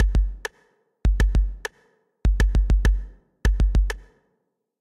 100bpm 2 measures 4
A 100 BPM, 2 measure electronic drum beat done with the Native Instruments Battery plugin
100BPM, electronic